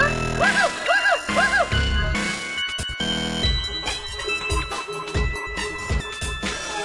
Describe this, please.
these are some rEmixes of hello_flowers, the ones here are all the screaming pack hit with some major reverb
cut in audacity, tone and pitch taken down and multiplied compressed,
and run through D.blue Glitch, (mainly a stretcher a pass a crush and
then a gate etc.) There are also some pads made from Massive.
ambient, remix, ugly-organ